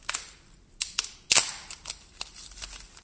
Snapping sticks and branches 06
Snapping sticks and branches
Digital Recorder
branches, timber, wooden